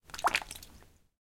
water drops and misc